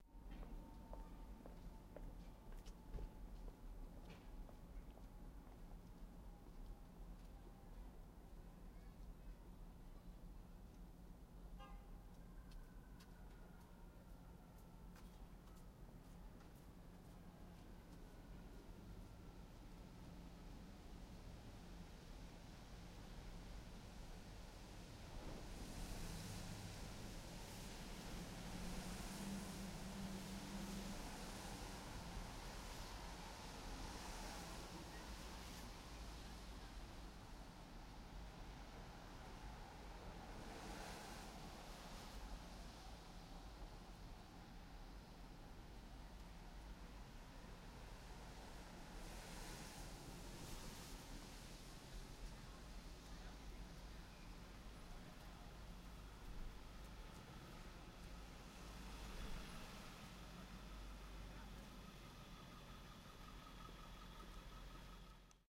01 brooklyn street day wet streets
Recorded in Cobble Hill, Brooklyn on an Alesis TWO-TRACK. I got a decent loop where nobody was talking on the street. There's a bit of walking, some honking in the distance, cars drive by on the wet road.
ambience, Brooklyn, cars, city, field-recording, noise, street, traffic, walking, wet-road